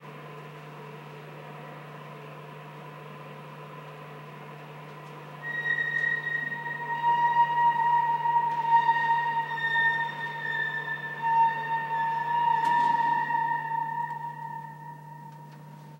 Telescope dome quietly opens, with some metallic harmonics as it stops. Recorded with mini-DV camcorder and Sennheiser MKE 300 directional electret condenser mic.